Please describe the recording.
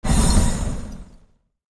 Magic fire spell Cast. Created with Logic's Alchemy Synthesizer.